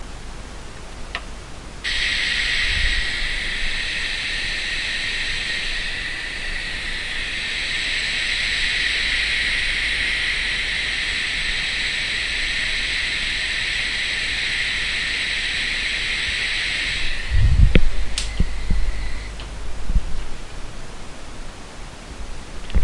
Gas Sample
This is a sound sample of my gas hob's turned on.
gas, hiss